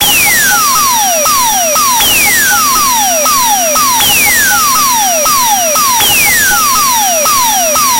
Aerobic Loop -16
A four bar four on the floor electronic drumloop at 120 BPM created with the Aerobic ensemble within Reaktor 5 from Native Instruments. Quite experimental and noisy but very electro. Normalised and mastered using several plugins within Cubase SX.
drumloop, loop, electronic, rhythmic, 120bpm